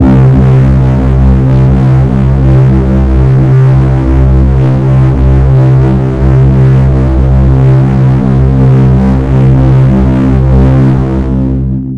13 ca pad b50
ambience, atmos, atmosphere, atmospheric, background-sound, horror, intro, music, score, soundscape, suspense, white-noise